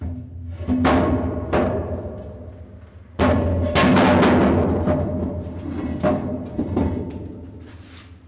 Opening the door of the unofficial catacombs of Paris from downstair recorded on DAT (Tascam DAP-1) with a Sennheiser ME66 by G de Courtivron.